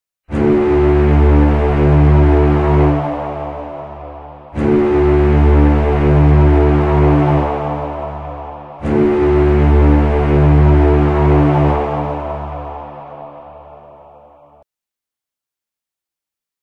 Cinematic Angry Astronef (Ultimatum)
Powerful, Loop, Drums, FX, Game, Octave, Strategy, Cinematic, Dramatic, Strings, Percussion, Orchestral, Epic, Movie